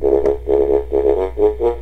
Sounds of electronic toys recorded with a condenser microphone and magnetic pickup suitable for lofi looping.
Perhaps I missed the DC offset on the tubas.
electronic, lofi, loop, loops, toy